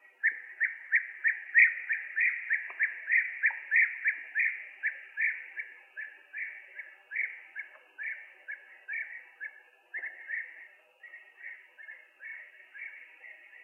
bee-eater.single
Single bee-eater singing. Background of cycadas filtered out /abejaruco solitario, tras filtrar el fondo de chicharras